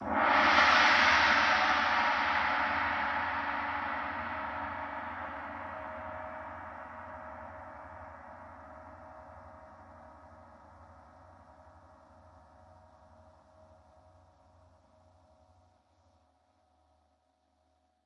Orchestral Concert TamTam Gong 05
Multi velocity recording of a full-size 28" orchestral symphonic concert Tam-Tam gong. Struck with a medium soft felt mallet and captured in stereo via overhead microphones. Played in 15 variations between pianissimo and fortissimo. Enjoy! Feedback encouraged and welcome.
symphonic, orchestral, cymbal, percussion, stereo, gong